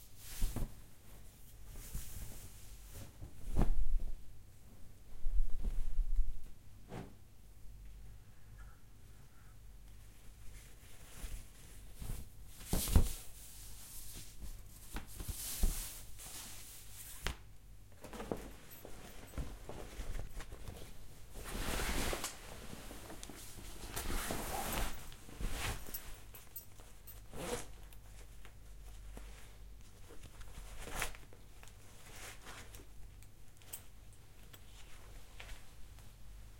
I recorded myself taking on my clothes. In stereo.